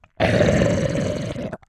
Pitched down 4 semitones and compressed a bit. Otherwise no processing...sounds good with a doubler though. :) Snorting, Growling, crying out of all kinds.
beast, creature, growling, grunting, human, monster, non-verbal, slurping, snorting, vocal, voice, voiceover